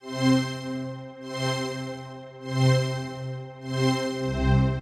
100 Concerta String 01
layer of string